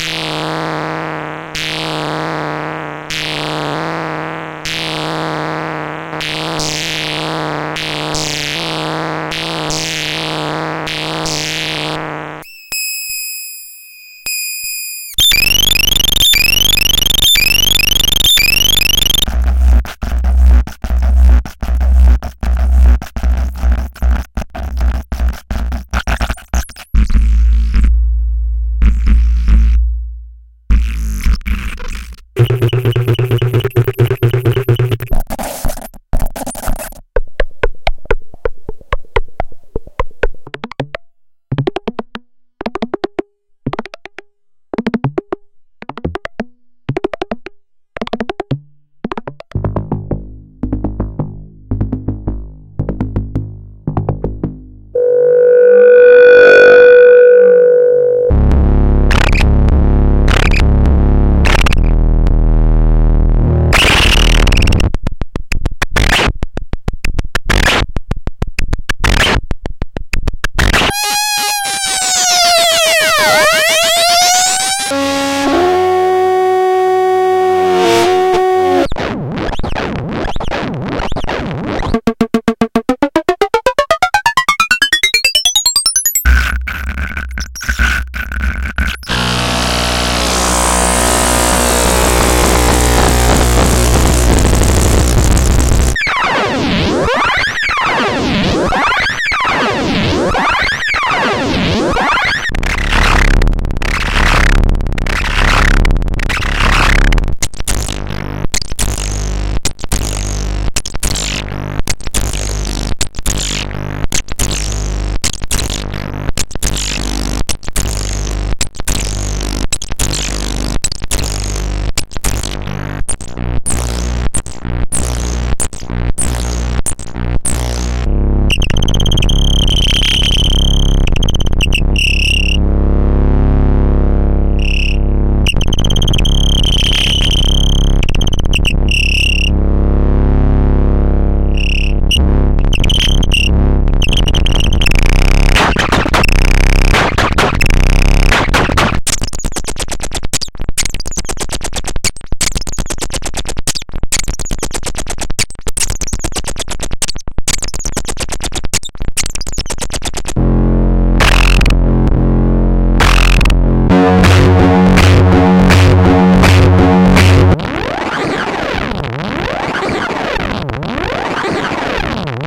Morphagene reel made with Serge modular synthesizer modules.
morphagene, mgreel, modular-synth